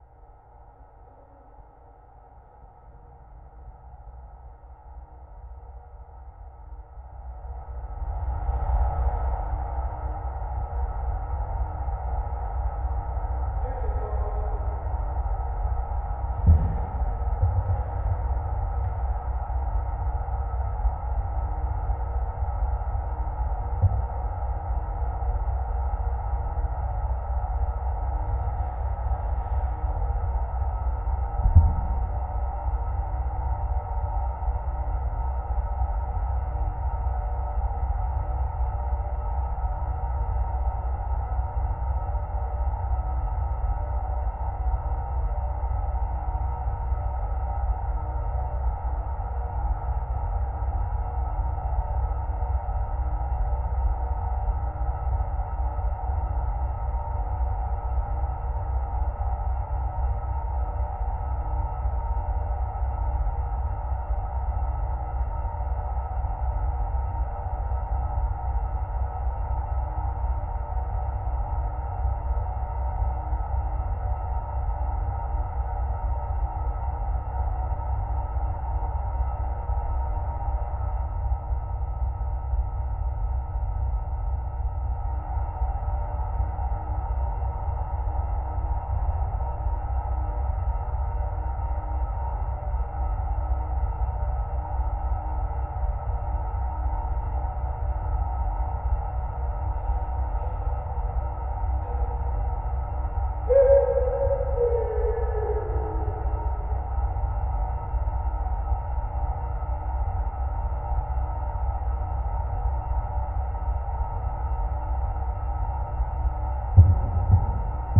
Free drone. Recorded using homemade contact microphones. The OS-XX Samples consist of different recordings of fans, fridges, espressomachines, etc. The sounds are pretty raw, I added reverb, and cut some sub. I can, on request hand out the raw recordings. Enjoy.